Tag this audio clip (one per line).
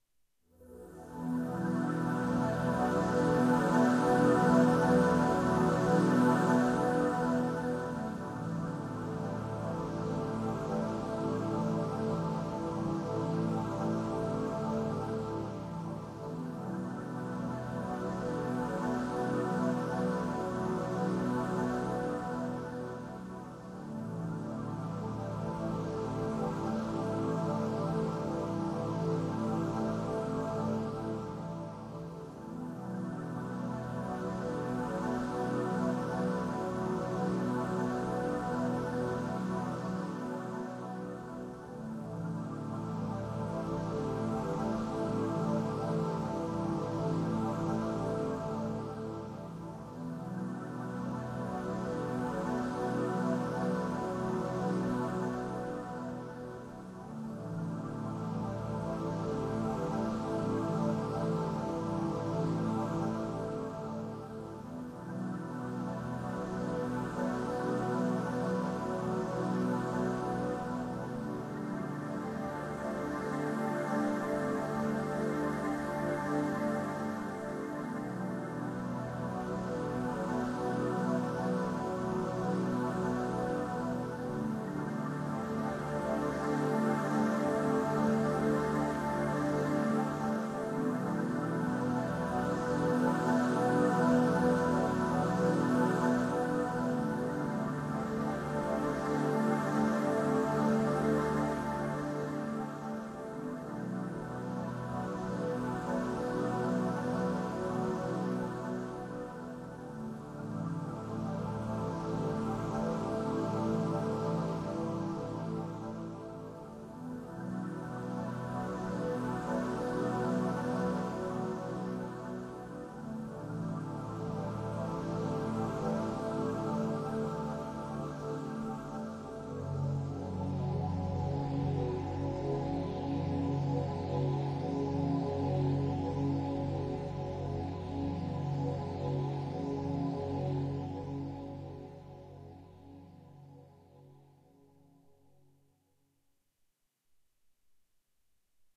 Ambient
Atmospheric
Background
Cinematic
Music
Opening
scene